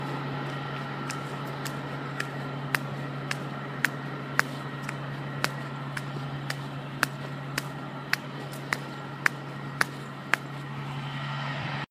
The sound of me stomping my bare-feet on a slightly gravel covered sidewalk.
Recorded in Winter Park, Colorado, United States of America, on Wednesday, July 17, 2013 by Austin Jackson on an iPod 5th generation using "Voice Memos."
For an isolated sample of the bus in the background, go to:
sidewalk, bare-feet, stone, bare, foot, footsteps